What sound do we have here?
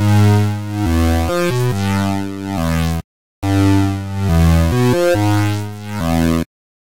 oscilation8step
dubstep synth that oscillates every eighth beat at 140bpm. to be used with bass wobble from this pack.